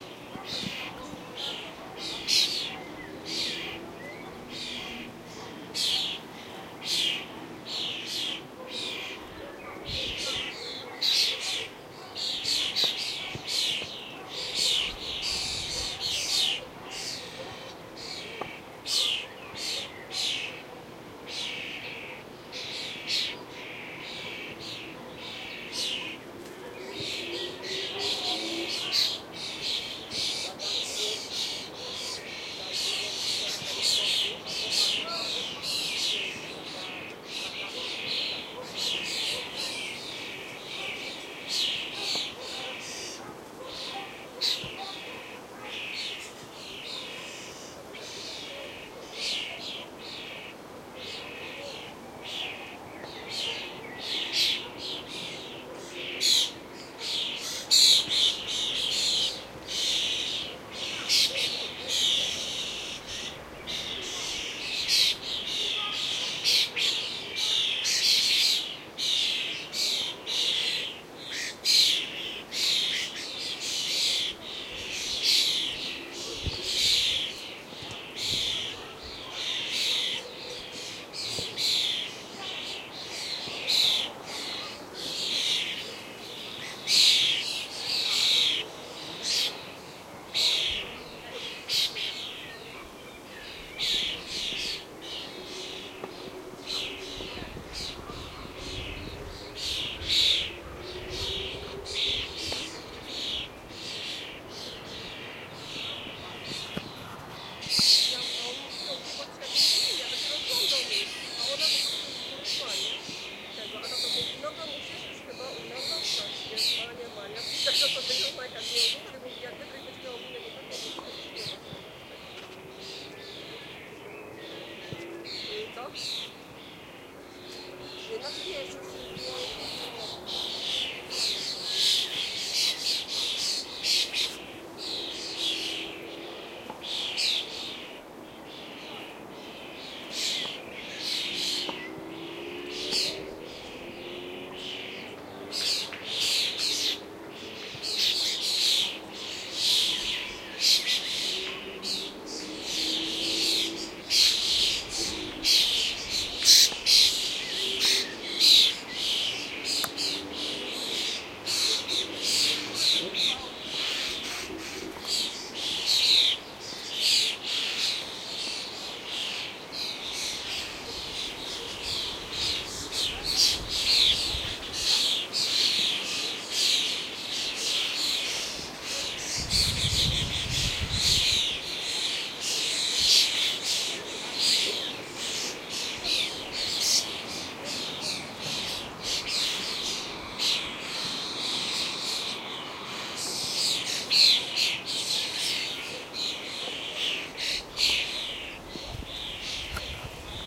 birds1-thriller-0o
another bird species (sorry I'm not ornithologist; some 'apple eaters'), recorded in Poland. this is the reference octave. while it sounds normal, next shifts in octaves uncover really scary sounds and textures, like godzilla or some dinosaurs. recording taken with zoom H2.